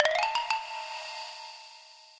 Eingang Incoming Chat Xylophone
Made with Bitwig Studio. Instrument: Xylophone. FX: Reverb.